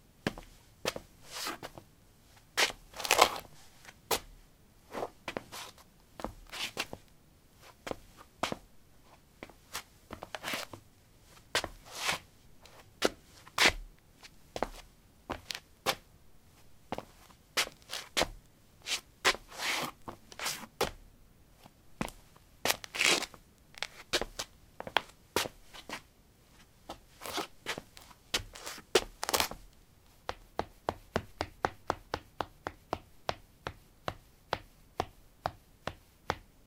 paving 11b sneakers shuffle tap
Shuffling on pavement tiles: sneakers. Recorded with a ZOOM H2 in a basement of a house: a wooden container filled with earth onto which three larger paving slabs were placed. Normalized with Audacity.